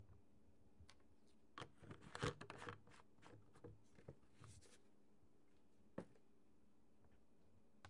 Top screwed onto plastic bottle
bottle, Top